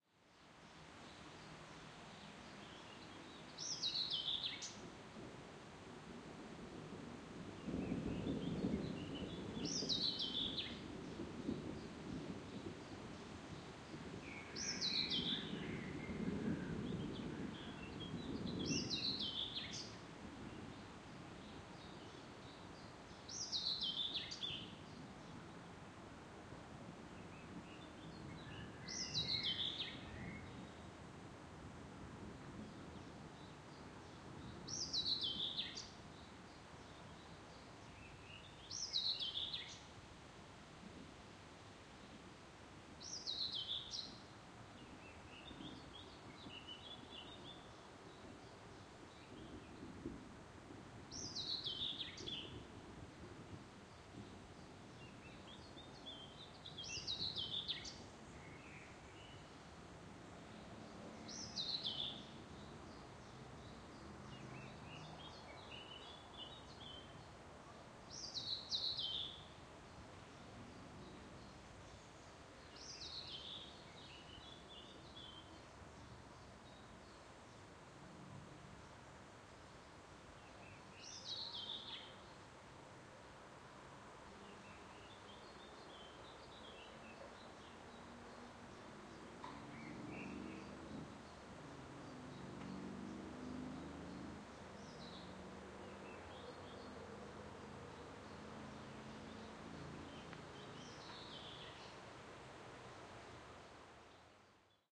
under flyover 300513
30.05.2013, about 13.00, North-west part of Ostrow Tumski (islet) in Poznan in Poland. Ambiance captured under a flyover (Panny Marii street): birds, noise of wind, soft rumble of drawing on thunder.
Marantz PMD661+ shure vp88.
birds, fieldrecording, flyover, Poland, Poznan, thunder, urban-nature, wind